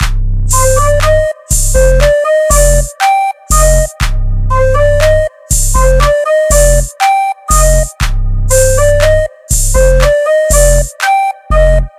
music loop
loop, music